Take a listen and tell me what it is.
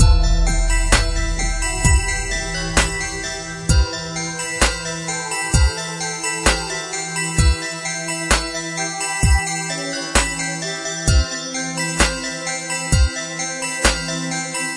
Loop NothingToFear 06
A music loop to be used in storydriven and reflective games with puzzle and philosophical elements.
sfx, indiedev, Puzzle, indiegamedev, videogame, video-game, gaming, music, Philosophical, game, music-loop, Thoughtful, games, loop, videogames, gamedev, gamedeveloping